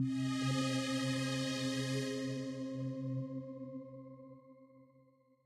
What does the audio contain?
Magic 1. Grain+Smooth
While making an ambient track simulating a fortune teller's caravan, I designed 3 sounds in Pro Tools by layering and editing presets within Ambience and Soft Pads. They add a bit of magical flare when the tarot card reader turns over a card.
This sound can be used for any kind of transition, item acquisition, quest accomplished, or other quick sound effect which needs a light, magical quality.
Complete, Discovery, Fantasy, Light, Magic, Mystical, Quest, Quick, Sound-Design, Task, Video-Game